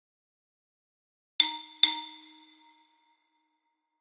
Xylophone Eye Blinks
Two cartoon "eye blinking" sounds performed on a synth xylophone instrument on Logic Pro. Credit's nice, but optional.
blink, wink, xylophone, cartoon, comedy, eye